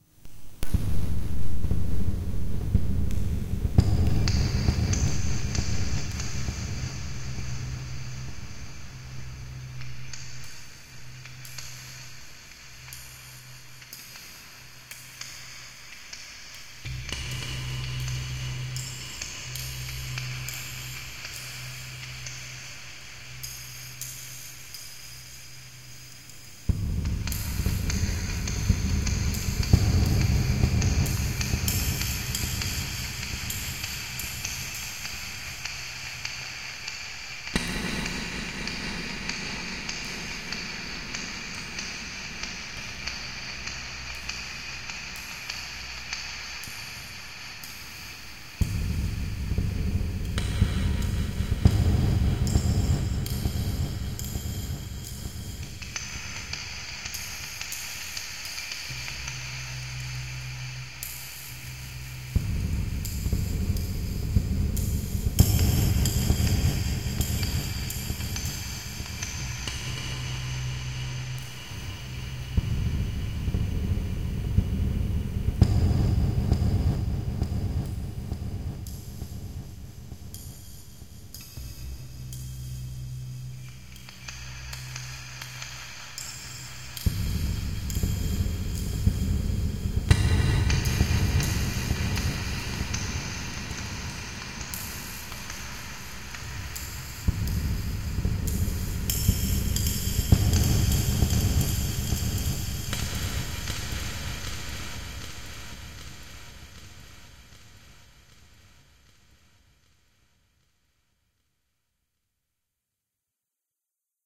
some noise made of what I have on my workplace... just in few minutes. enjoy =)